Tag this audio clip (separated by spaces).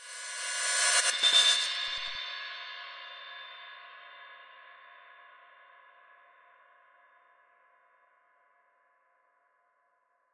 disappear end fail free fx game gameover gamesfx lose loss sfx sound-design stop